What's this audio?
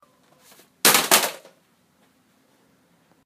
Laptop Drop2
Laptop dropping on cement.
laptop-break, laptop-drop, laptop-smash